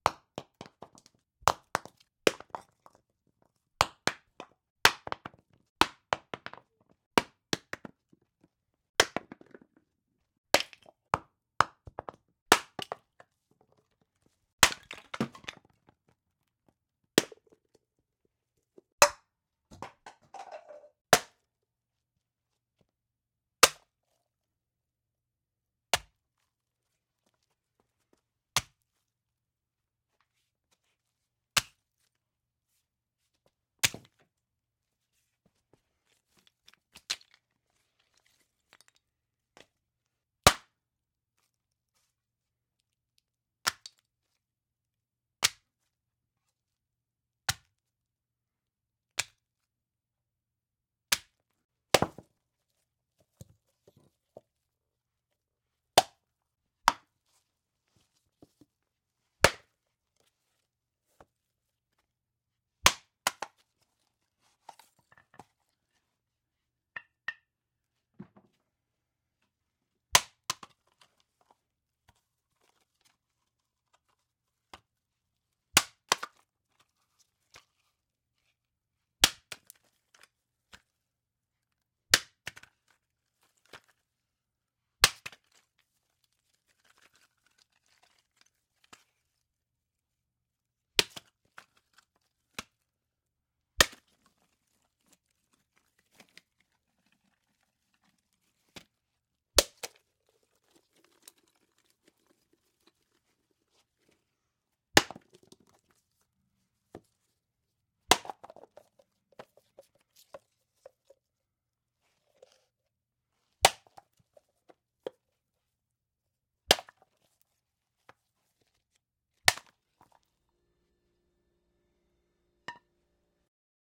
Dropping, hitting, throwing coconuts onto a concrete floor.
coconut, crack, drop, foley, hit, impact, smash